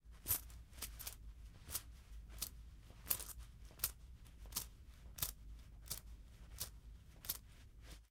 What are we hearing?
coin jangle in pocket
change in the back pocket of a pair of jeans
change, coin, jeans, pants, pocket, walk